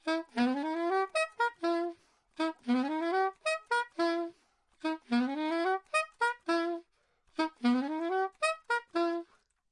Free jazz style.
Recorded stereo with L over the middle of sax and R to the bell mouth.
Listen free improvisations to:
saxophone, sax, loop, soprano, melody, soprano-sax